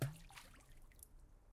Dropping medium-sized stone in still surface water